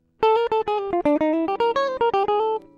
guitar melody 5
jazzy, groovie, jazz, guitar, lines, acid, pattern, fusion, funk, apstract, licks